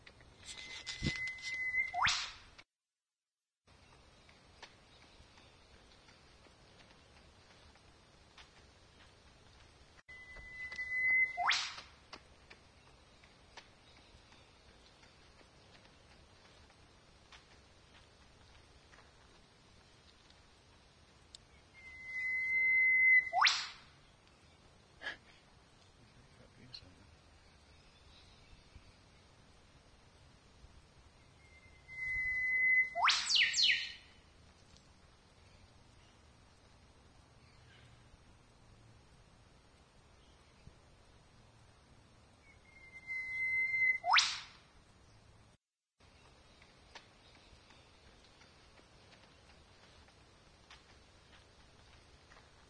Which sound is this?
Auissie Whipbird
Recorded on Nokia N900 in December 2010.
Location: Central Coast, NSW, Australia